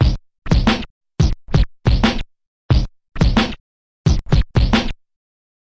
Scratching Kick n Snare @ 89BPM